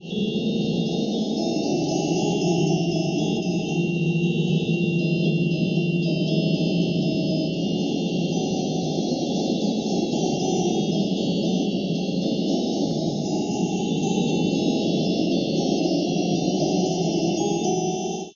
ring oscillation
An oscillating frequency taken from radio airwaves and manipulated with filters and effects in a variety of ways. Just a little experiment. 4 layers of sound in stereo.
alien, atmosphere, drone, fiction, oscillation, ringing, science, sci-fi, space, transport, whirl